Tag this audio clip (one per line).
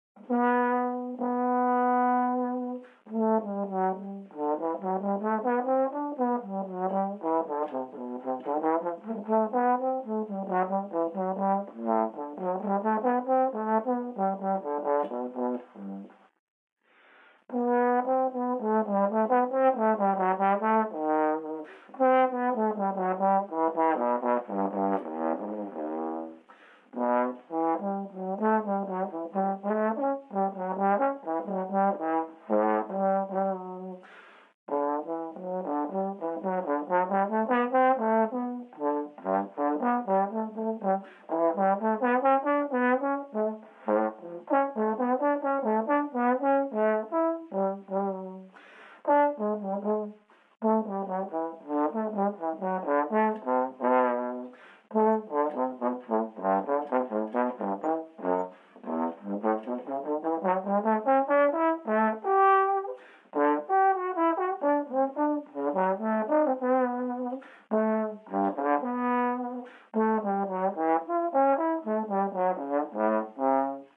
song
one-man-band
trombone